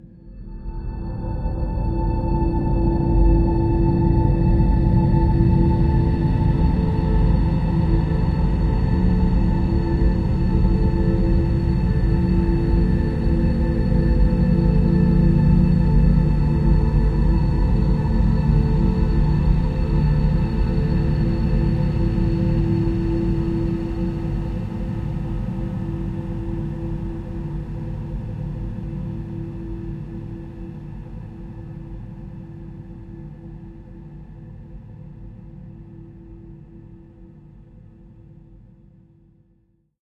LAYERS 023 - Thin Cloud-93
LAYERS 023 - Thin Cloud is an extensive multisample packages where all the keys of the keyboard were sampled totalling 128 samples. Also normalisation was applied to each sample. I layered the following: a thin created with NI Absynth 5, a high frequency resonance from NI FM8, another self recorded soundscape edited within NI Kontakt and a synth sound from Camel Alchemy. All sounds were self created and convoluted in several ways (separately and mixed down). The result is a cloudy cinematic soundscape from outer space. Very suitable for soundtracks or installations.
cinimatic, cloudy, multisample, pad, soundscape, space